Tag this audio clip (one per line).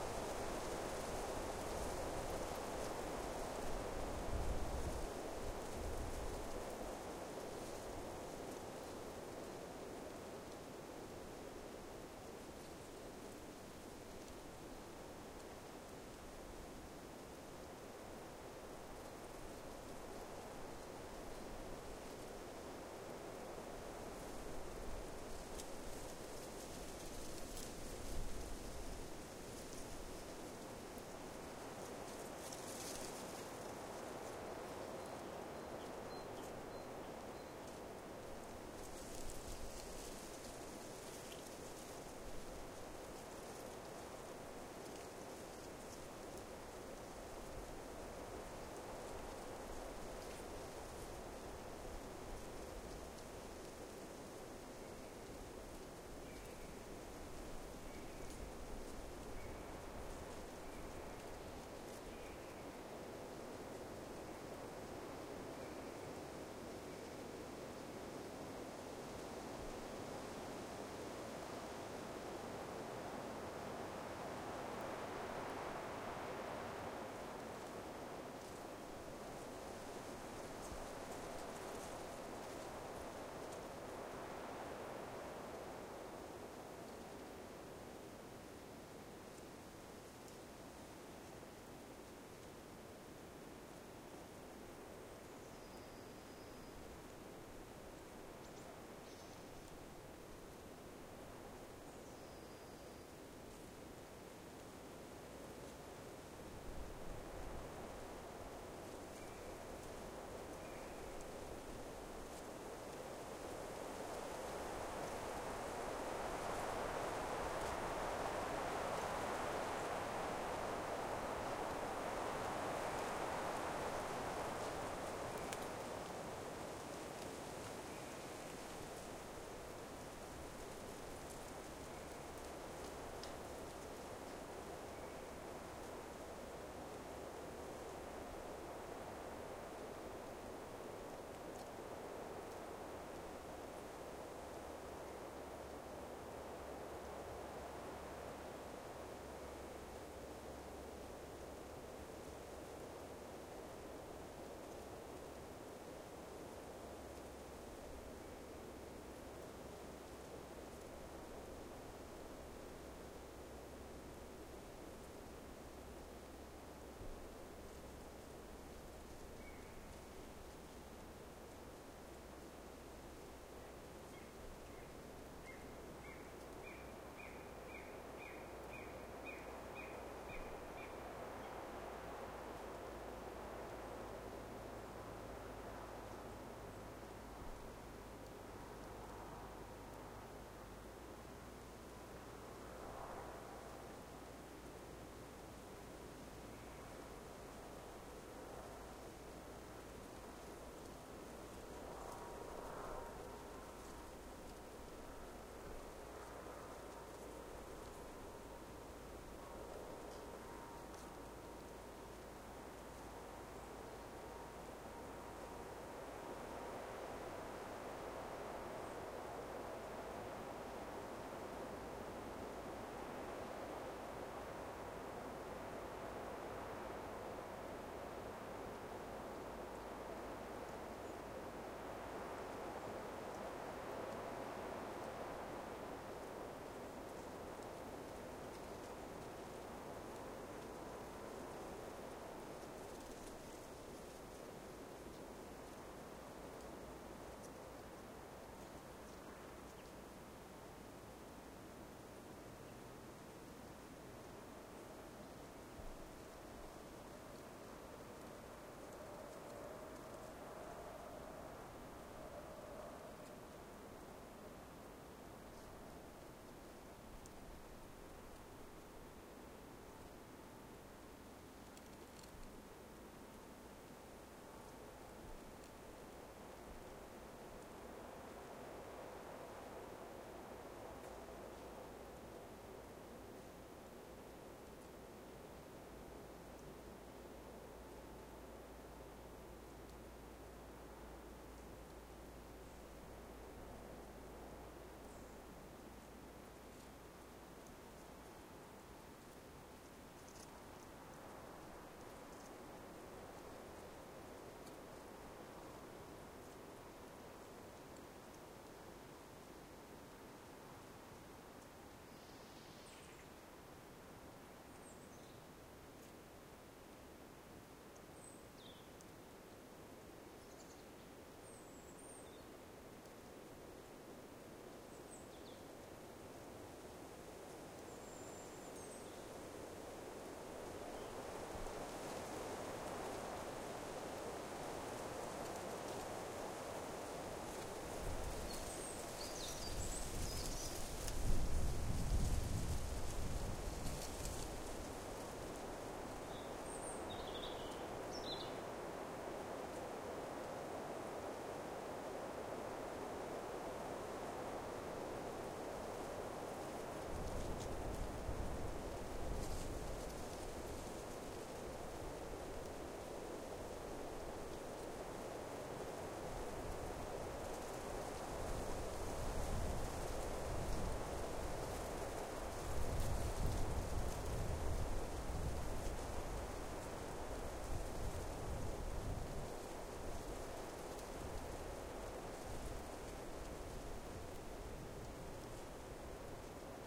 field-recording
forest
leaves